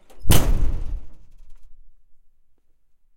Locker Slam 2
Slamming of a metal locker.
Locker, metal, slam